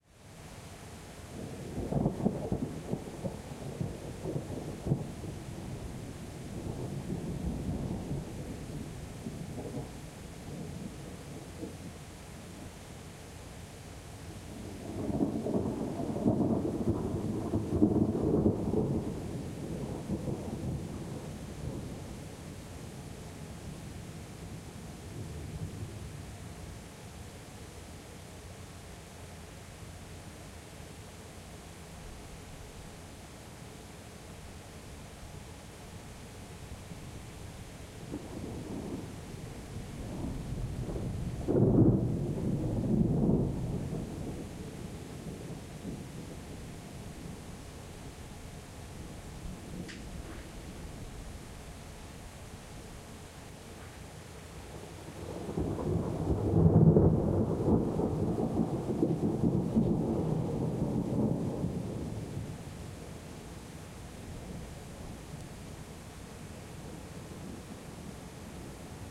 Some more nice thunderclaps from the same storm.
1:09 - Recorded July 1988 - Danbury CT - EV635 to Tascam Portastudio.
field-recording, thunder